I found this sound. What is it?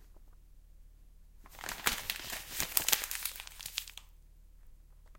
Crumpling Paper
Sound of paper being crumpled up.